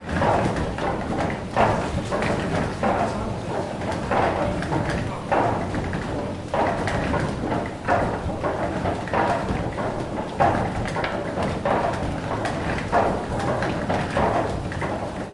Old water mill Arnhem 2017
Field-recording of an old water mill. Zoom iQ6 X/Y stereo.